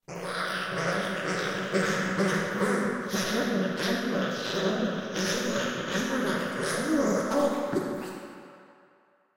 Baby Zombie
I reversed my voice and pitched it so that it sounds like newborn's zombie like.
child, baby, infant, newborn, kid, cry, unhappy, scream, ambient, crying, ghost, dark, horror